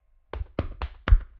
A hits of a monsters in the ground